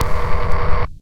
The sample is an industrial loop that can be used at 120 BPM. The sample consists of a short electronic click followed by some noisy machinery sounds.
120BPM, industrial, loop, noise, rhythmic